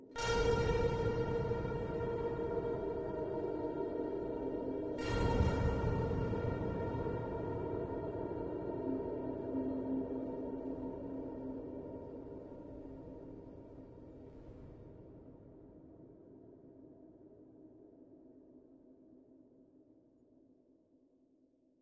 LAYERS 001 - Alien Artillery - A#4
LAYERS 001 - Alien Artillery is an extensive multisample package containing 73 samples covering C0 till C6. The key name is included in the sample name. The sound of Alien Artillery is like an organic alien outer space soundscape. It was created using Kontakt 3 within Cubase.
artificial, drone, multisample, pad, soundscape, space